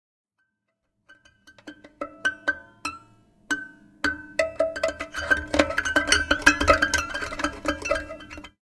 Tense Guitar
A guitar strums really creepily. Can be used to bring a creepy vibe to anything.
MUS, Garcia, SAC, Guitar, Horror, Creepy, Scary, 152